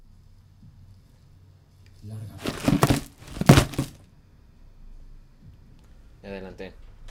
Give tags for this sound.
cajas cajon

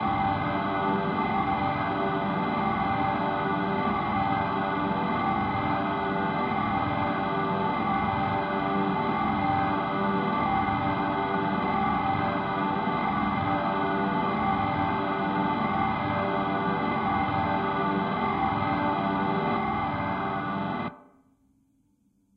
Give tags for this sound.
ambient atmosphere drone artificial harsh Ableton-Live

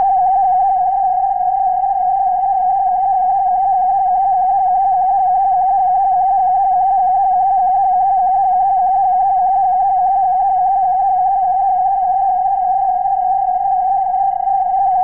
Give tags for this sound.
laser abox death-ray vintage synthetic sci-fi B-movie ray-gun

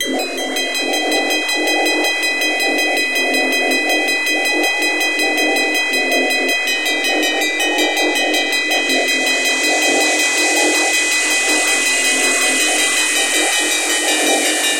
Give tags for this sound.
Dark Darkwave Experimental Noisecore Ambient Easy breakbeat Noise Listening Psychedelic new NoiseBient

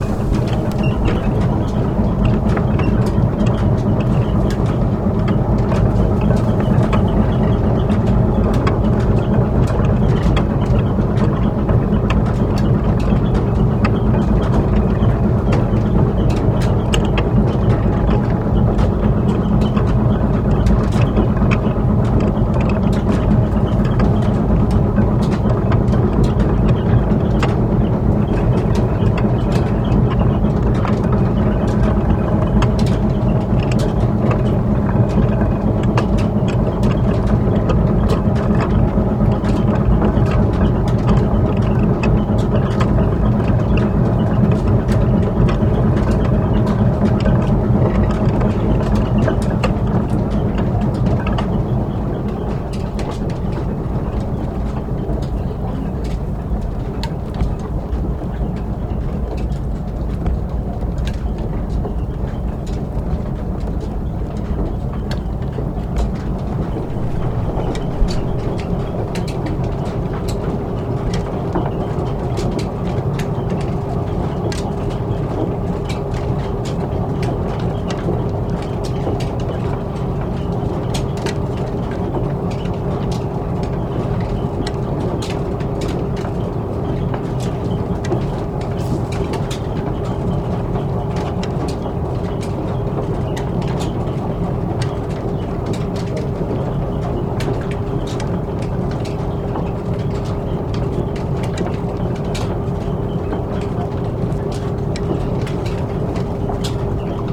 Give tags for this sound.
factory industrial machine machinery mill

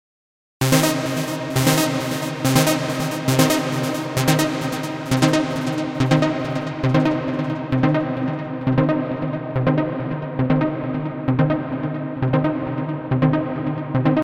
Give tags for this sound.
acid dance electronica synth trance

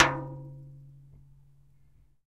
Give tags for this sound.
bang can clang flick flicked flicking hit impact metal metallic percussive strike water watering watering-can

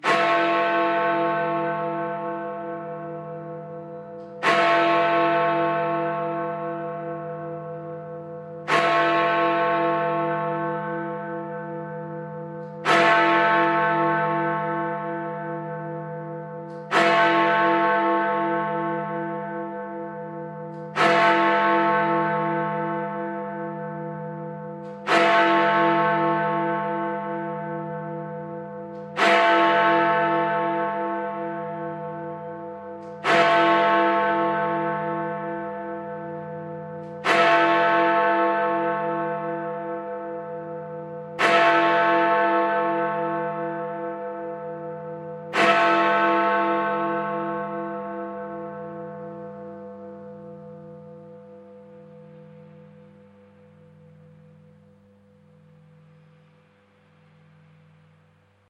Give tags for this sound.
ben strikes big

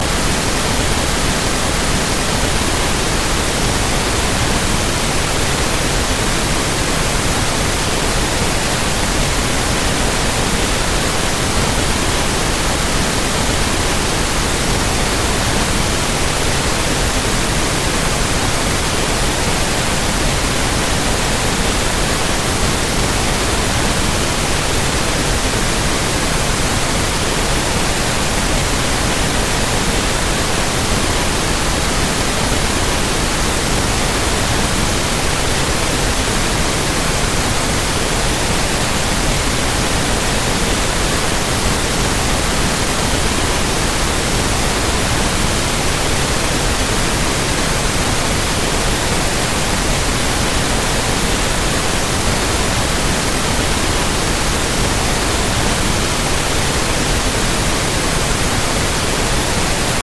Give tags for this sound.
pink pinkness noises noise

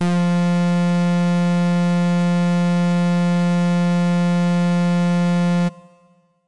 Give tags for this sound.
brass synth

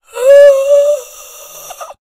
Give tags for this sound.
arcade Evil game gamedev gamedeveloping games gaming Ghoul Growl horror indiedev indiegamedev Lich Monster sfx Speak Talk Undead videogame videogames Vocal Voice Voices Zombie

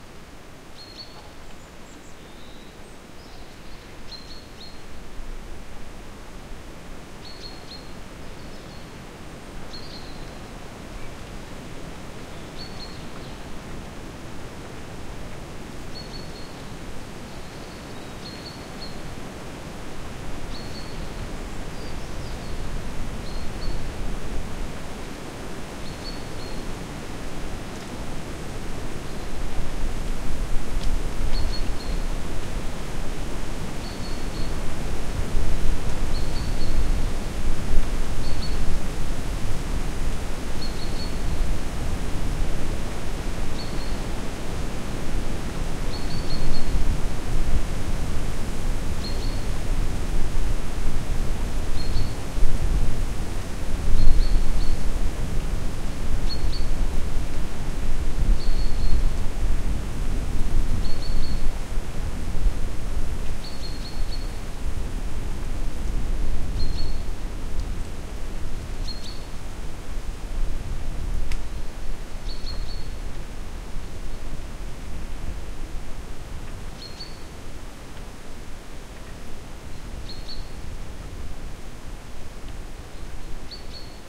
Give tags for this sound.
storm wind field-recording windgust

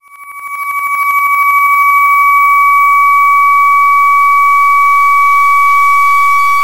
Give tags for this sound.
8-bit arcade battle battles chip chippy computer decimated lo-fi machine noise retro robot role-playing roleplaying RPG video-game

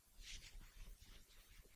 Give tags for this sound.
clothing clothes

